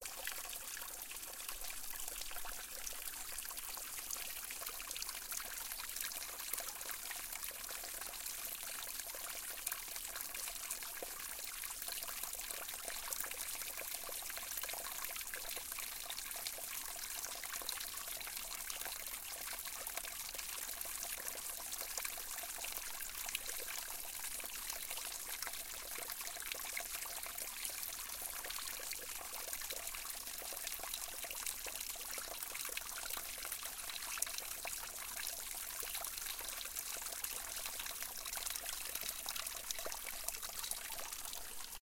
A mountain stream's sound from Dolomiti, Northern Italy. Tascam DR-05
Stream, Water